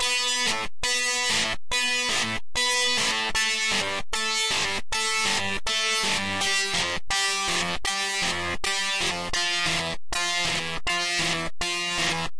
Live Crisp organ 03
organ.crisply modified.
bass, live, organ